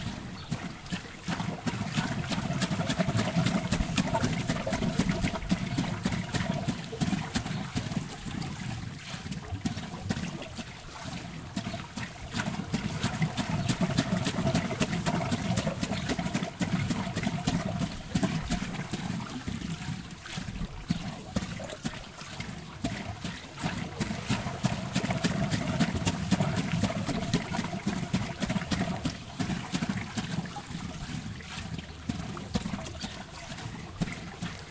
Sunken Garden Waterwheel
Built in 1911, the waterwheel was donated to the city by Mrs H H Phillips of Te Rehunga in Dannevirke.